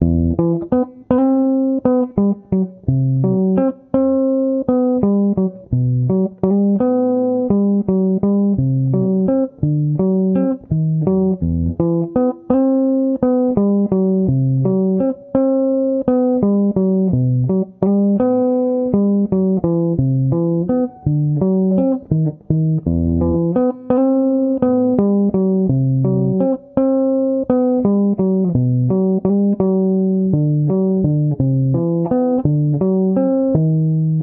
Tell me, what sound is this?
Eminor theme 84bpm
guitar, lallifraendi, simple, chords, spanish